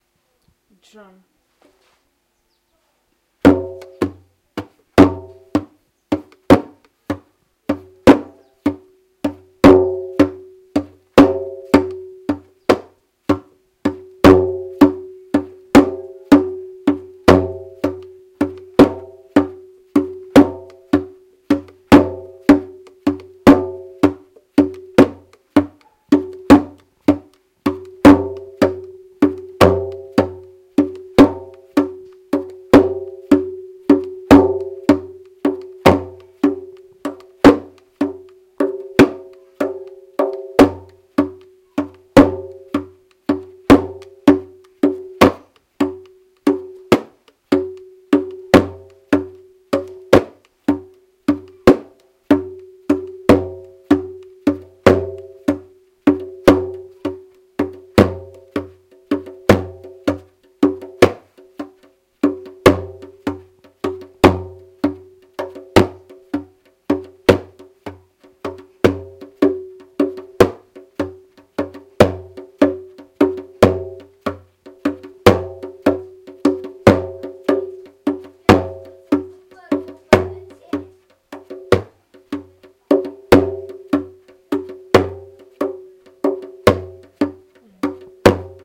Drums percussions
Drums recorded with a Zoomrecorder.